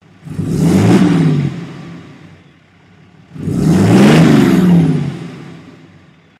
Truck-Diesel 10dodge rev